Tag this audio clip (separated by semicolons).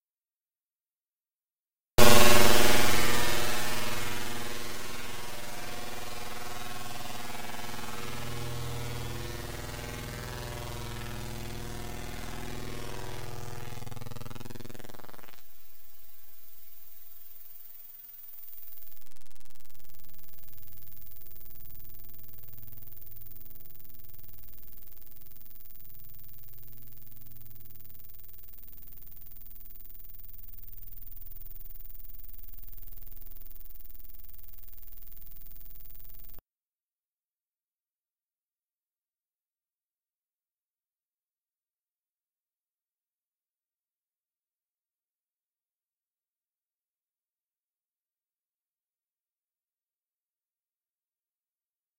Dare-26; databending; experimental; image-to-sound; sound-experiment; unpleasant